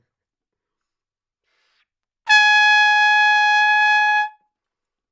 Trumpet - Gsharp5
Part of the Good-sounds dataset of monophonic instrumental sounds.
instrument::trumpet
note::Gsharp
octave::5
midi note::68
good-sounds-id::2850
multisample, Gsharp5, good-sounds, trumpet, single-note, neumann-U87